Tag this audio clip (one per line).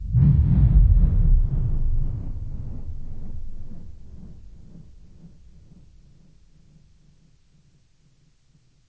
experimental
effect
drone